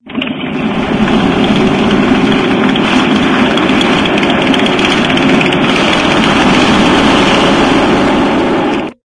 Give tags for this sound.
mechanical
motor
recording
live

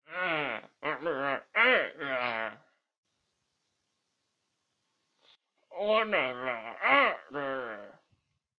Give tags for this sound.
male
noise
voice

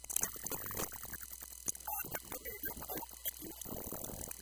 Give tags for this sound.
micro
digital
broken-toy
speak-and-spell
music
noise
circuit-bending

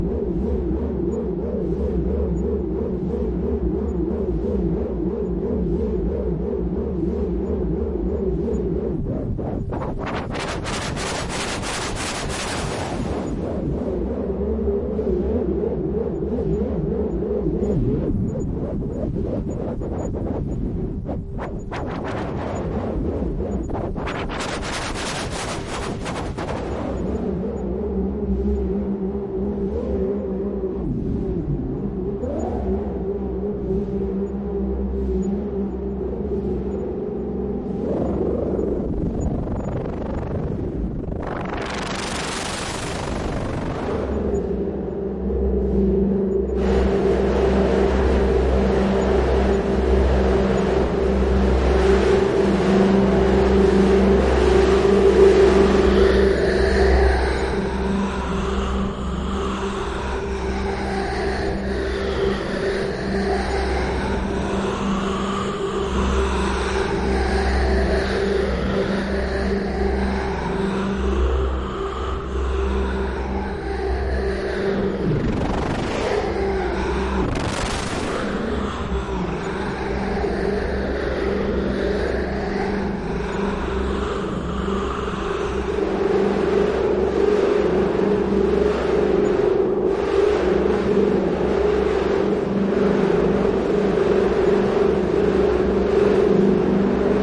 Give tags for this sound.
abstract
atmosphere
digital
drone
effect
electronic
experimental
fx
lfo
mechanic
noise
rotation
sci-fi
sound-design
strange
weird
wind

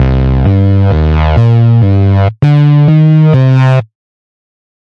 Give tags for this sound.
loop
bass
electro
dance
rhythmic
electronic
120BPM
ConstructionKit

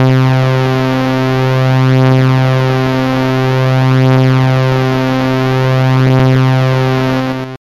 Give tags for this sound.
saw
detuned

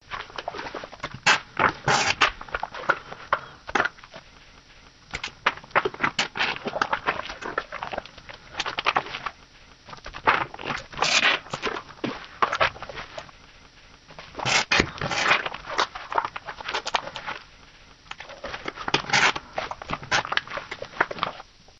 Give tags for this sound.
Alien
Experiment
Extraterrestrial
Project
Sound
Voice
Voz

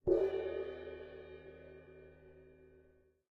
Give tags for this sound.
Short
Foley
Wood
Triangle
Recording
Bongo
One-Shot
Maraca
Rainstick
Clap
Gong
Metal
Cabasa
Sound
Beat
Drums
Design
Ethnic
Percussion
Hit
Bells

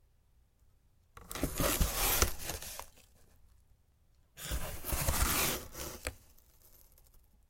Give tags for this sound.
box
cardboard
flap
open

